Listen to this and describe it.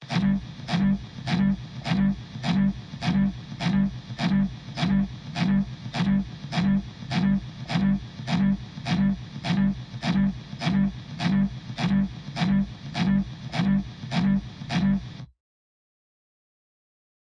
Percussion Loop4
A repeatable drum loop created using a Pure Leaf tea bottle, and a Samson USB studio microphone. Recorded on 8/22/15. Altered using Mixcraft 5.
altered, bottle, cleaner, container, drum-loop, drums, improvised, percussion-loop, percussive, recording